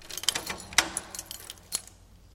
Clattering Keys
clatter, clattering, keys, metal, objects, rattle, rattling, rumble, shaked, shaking